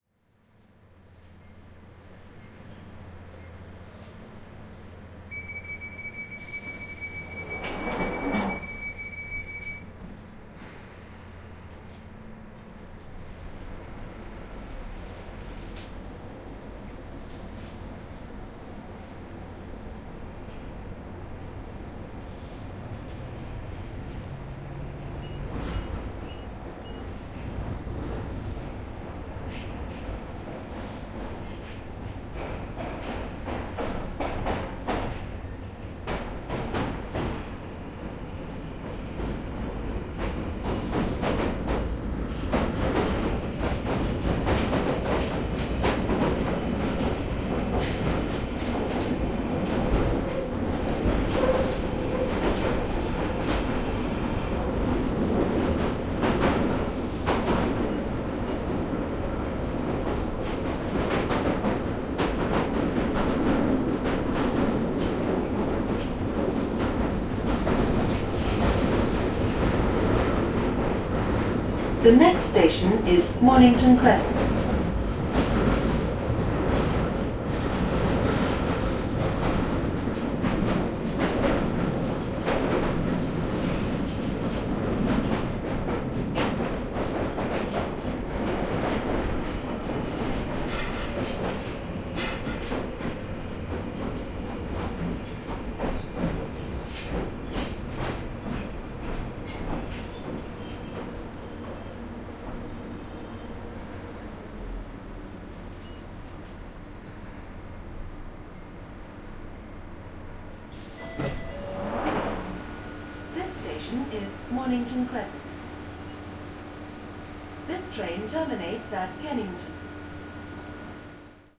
tube to Mornington 2
Using my Zoom H4n I recorded a tube (London Underground) journey from Camden to Mornington Crescent.
camden, field, london, metro, recording, tube, underground